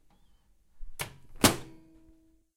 A microwave door shutting
door, kitchen, microwave, shut, shutting